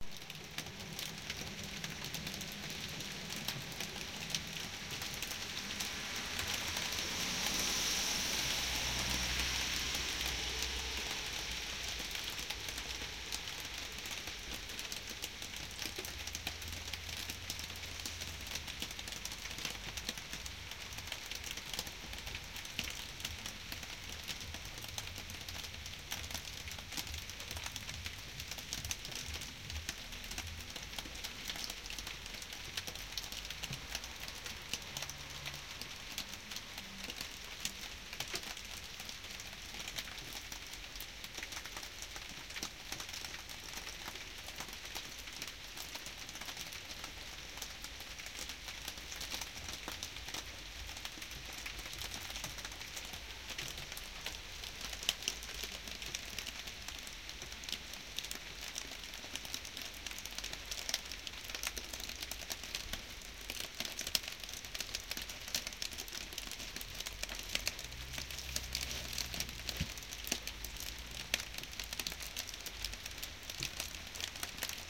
rain with near drops
rain sound with near drops very satisfying.
drops, pioggia, rain